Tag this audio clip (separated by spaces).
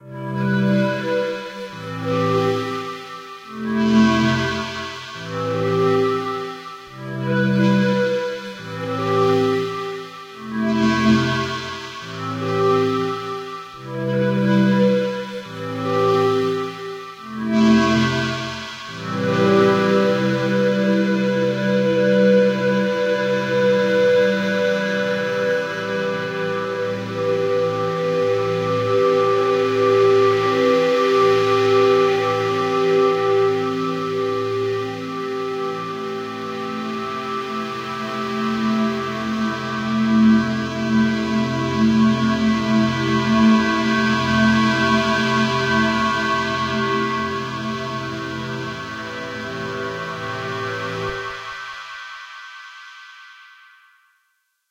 Ambiance Ambient Corsica-S Drums Loop Looping Piano